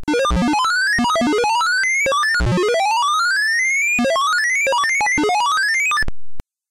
Audio demonstration of the shell sort algorithm from a Quick Basic 4.5 example program called SORTDEMO.BAS